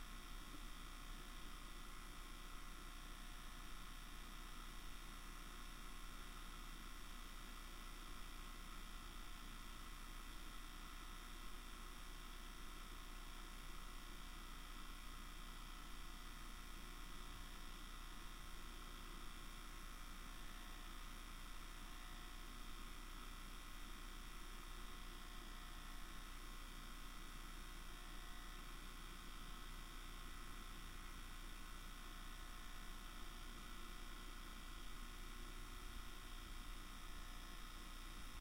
Simply a tape playing in my VCR.Recorded with the built in mics on my Zoom H4 inside the tape door.
drone, motor, tape, transport, vcr